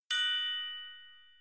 A chord I recorded on my glockenspiel using a Blue Snowball iCE microphone
bells, chime